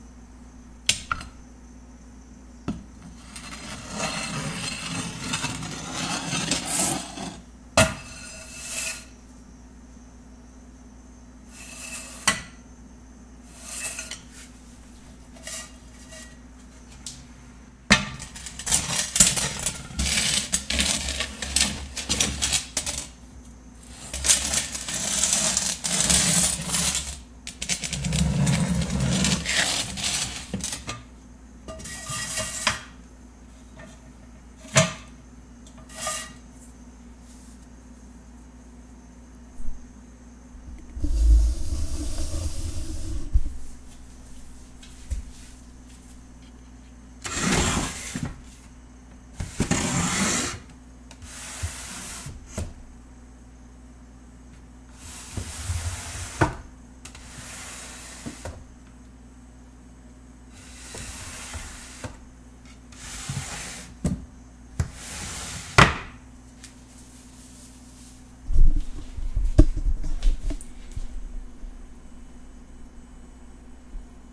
pots and pans / drawers
Pots and pans being slid across hardwood floor as well as wooden drawers opening and closing. just some various sound effects made for a stop-motion.
closing, drawers, opening, pans, pots, scraping, shuffle, sliding, sound-effects, wood